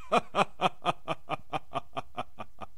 evil man laughing
My laugh, recorded in home.
mad, laughing, laughter, laugh, man, male, evil